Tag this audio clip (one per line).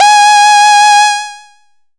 basic-waveform
multisample
reaktor
saw